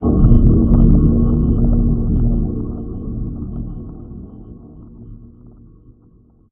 Echoing Bubbling Under Water Short
SFX. Sounds like slow (but short) diving into deep dark water
short-echoing, water